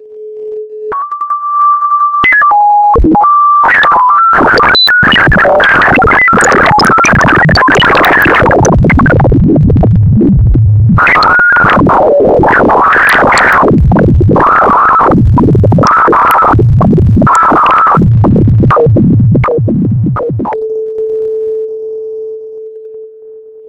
Another cacaphony of broken bleeps
Created with a feedback loop in Ableton Live.
The pack description contains the explanation of how the sounds where created.
broken, beep